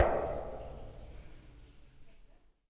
metal hit 1
hit, junk, metal, urban